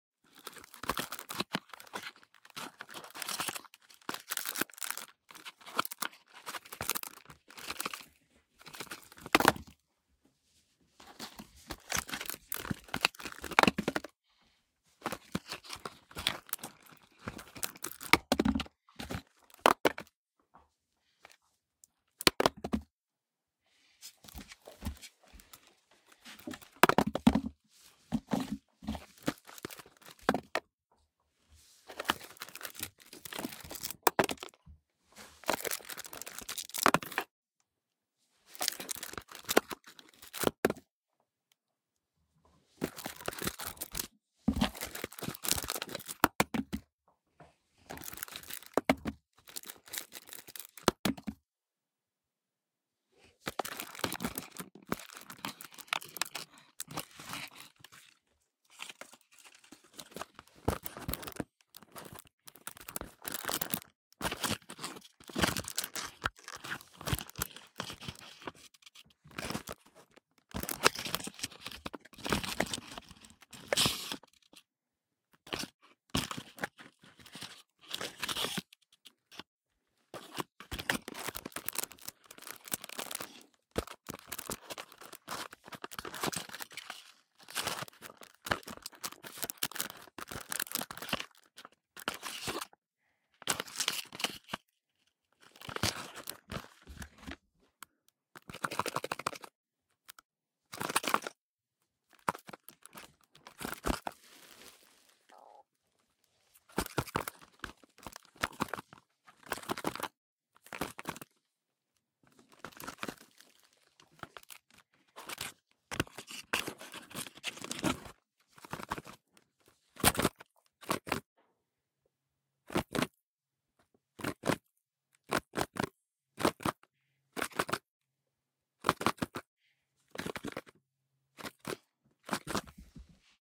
Cigarette box handling-shaking-dropping
Cigarette box handling, shaking (with 3 cigarettes in it), dropping, opening, pulling cigarette out, etc.